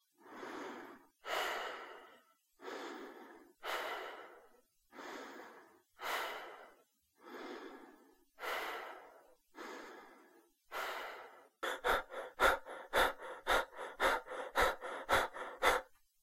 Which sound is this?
Man breathing regularly then faster
a man's breath in slow and fast ways.